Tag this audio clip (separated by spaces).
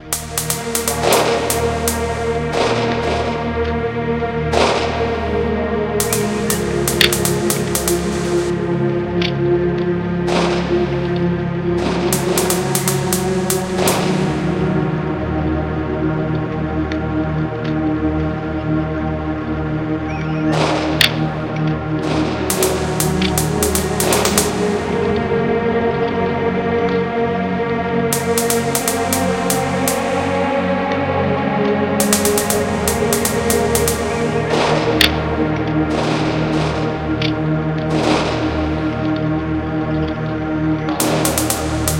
cinematic strings fire sad birds music sad-music movie snare-beat shots slow dramatic film